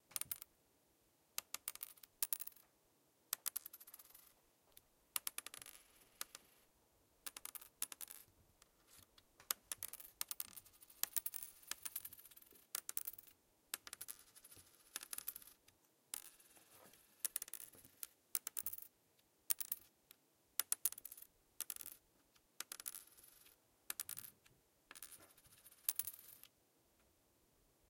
mySound Piramide Shahman
Sound from objects that are beloved to the participant pupils at the Piramide school, Ghent. The source of the sounds has to be guessed.
BE-Piramide, falling-plastic-chips, mySound-Shahman